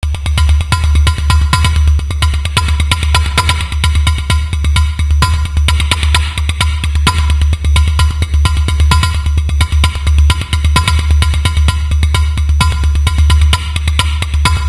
Loop made with Propellerheads Reason with a good sub base. 130 bpm